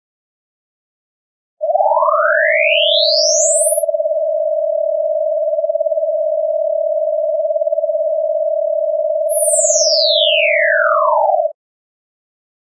Ascending / Descending snyth note